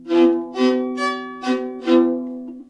open 2-string chords, violin